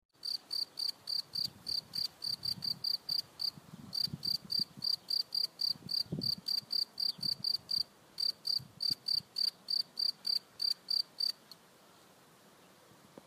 a single cricket, low wind noises, recorded with iPhone 6 in mono
ambient, field